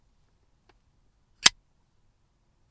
A zippo closes
close, lighter, zippo